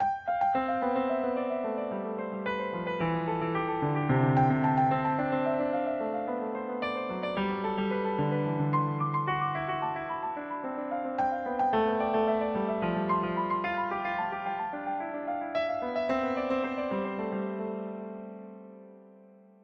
another piano snippet like a day dream
piano, melody